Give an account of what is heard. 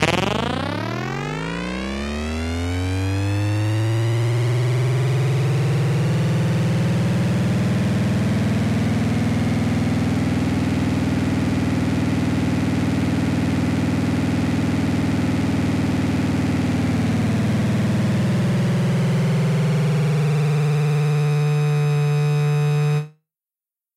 Space craft or ufo sound, could be used for game sounds.
alien, artificial, machine, mechanical, science-fiction, sfx, space, ufo